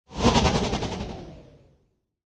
A stuttering whoosh with left to right pan.